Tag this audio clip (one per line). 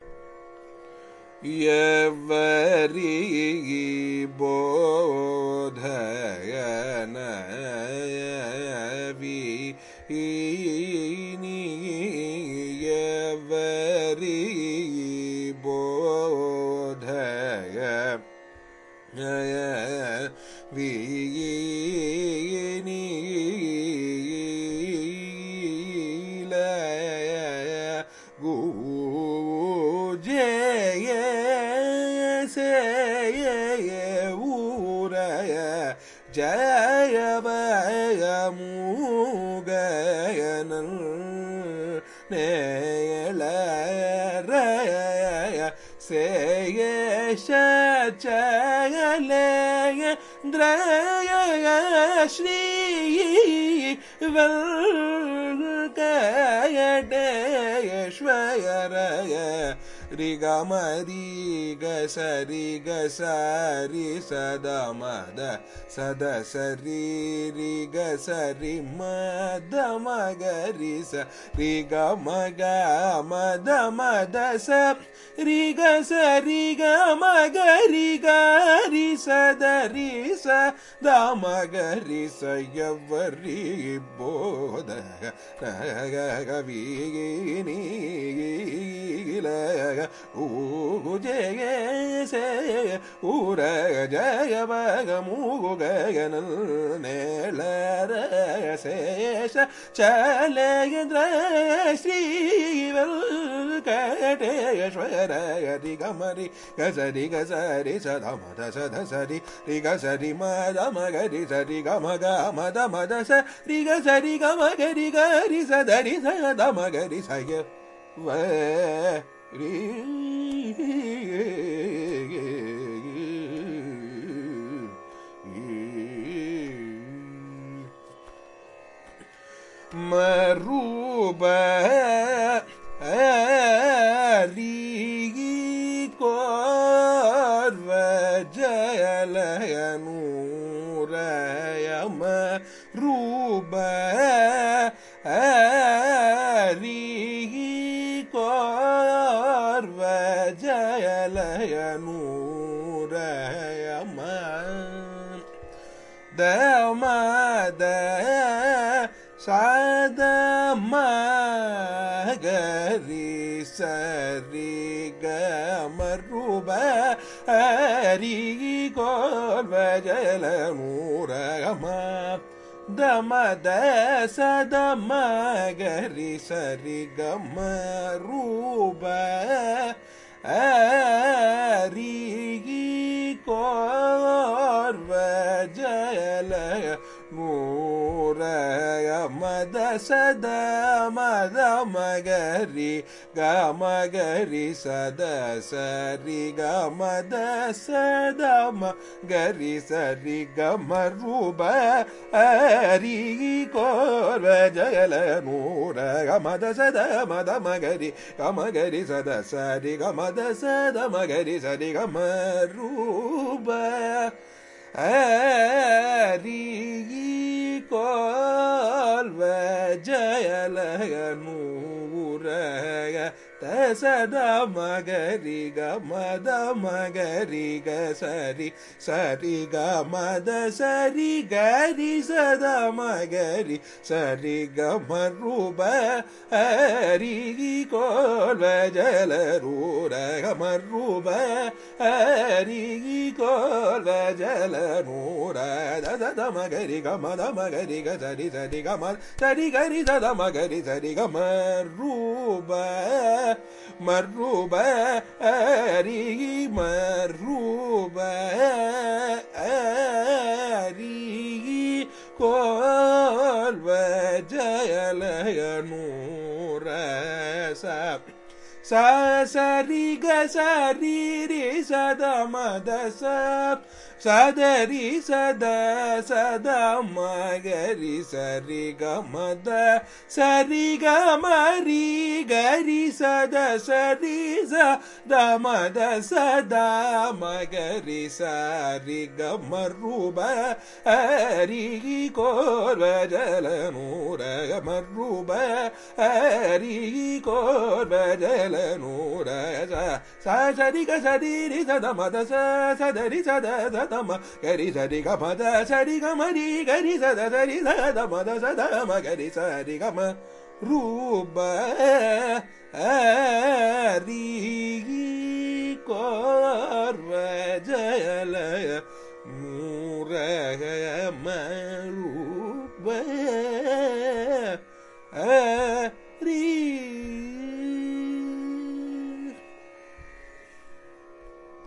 carnatic
varnam
music
iit-madras
carnatic-varnam-dataset
compmusic